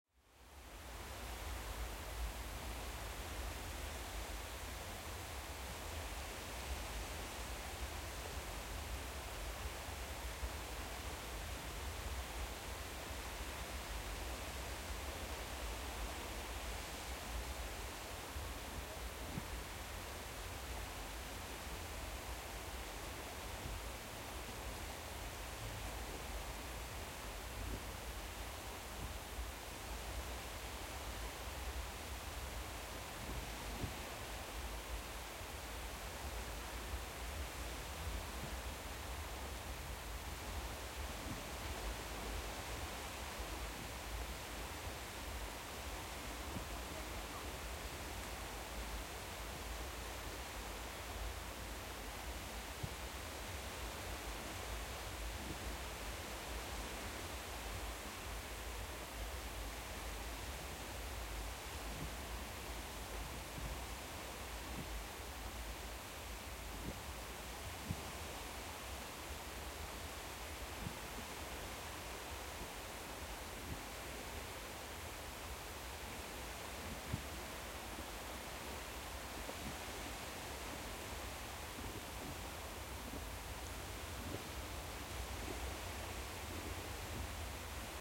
20170101 Pattaya Beach at New Year Celebration 02
Pattaya Beach at New Year Celebration, recorded with Rode iXY.
beach, fireworks, newyear